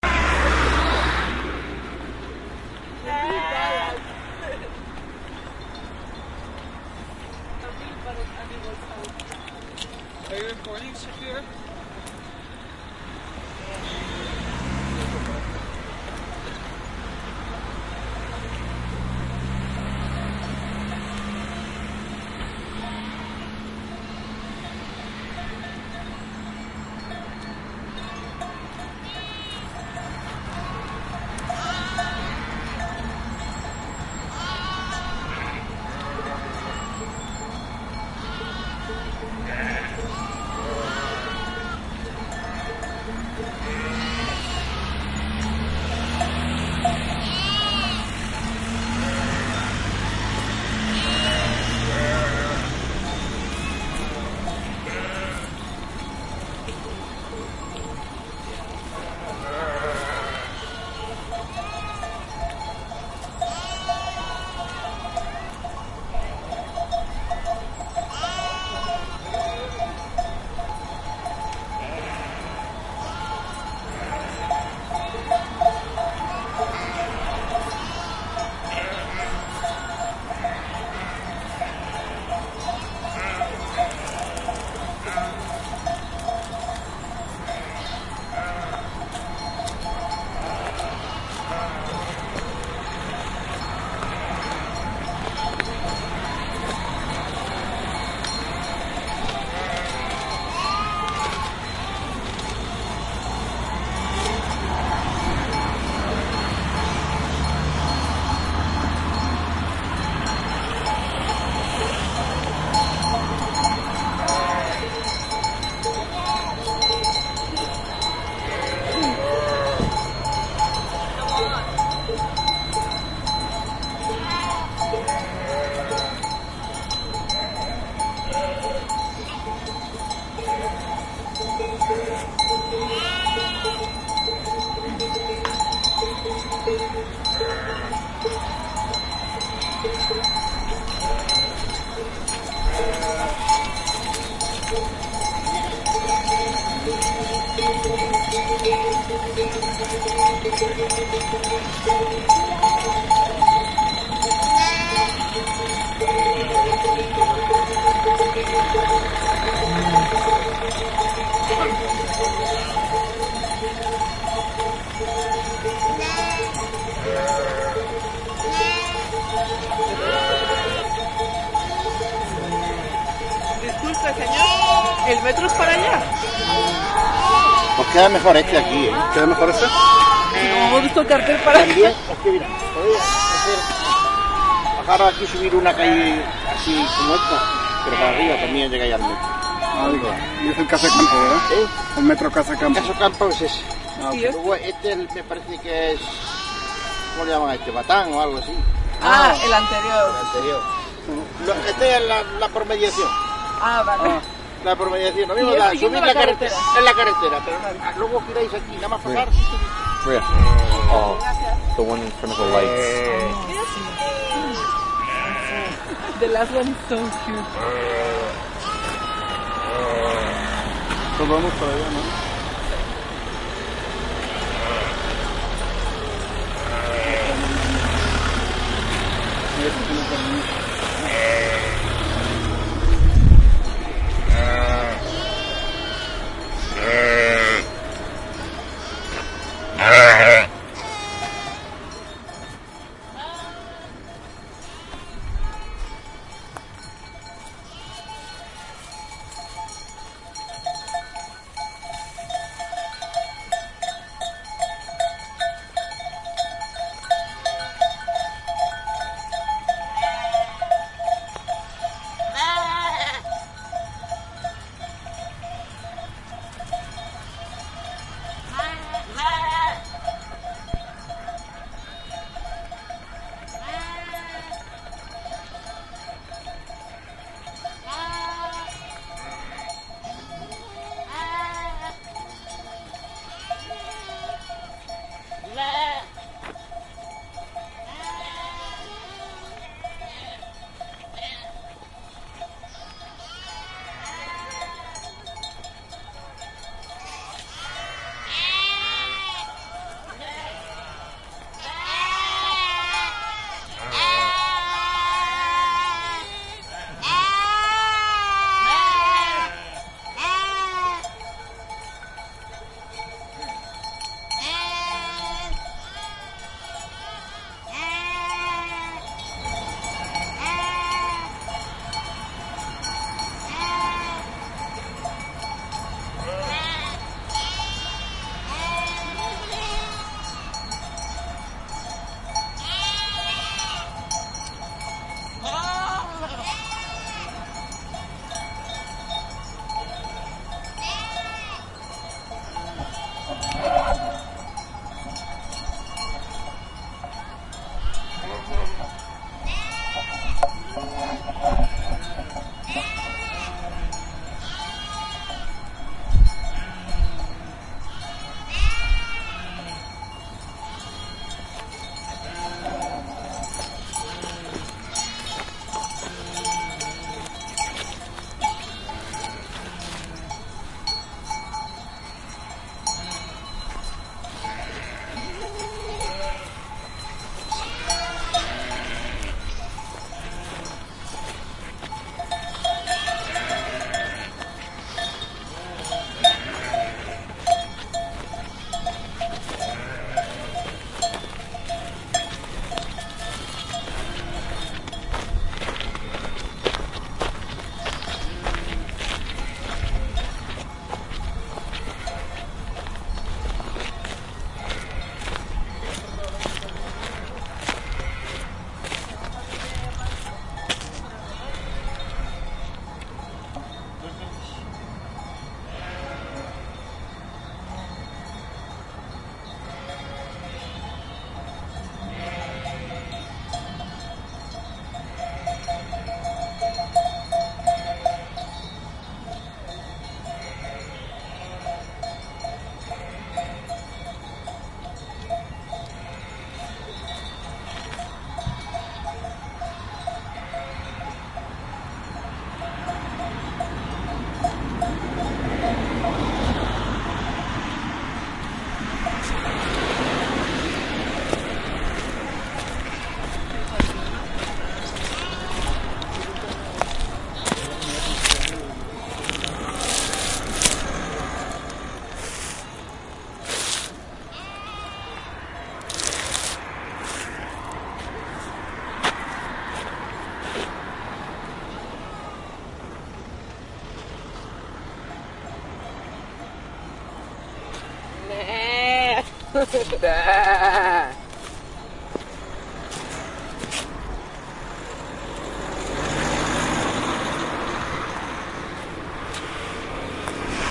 A walk through a flock of sheep, bahhing and ringing their sheep bells.
animals, bells, casa-del-campo, field-recording, flock, madrid, ntb, sheep, spain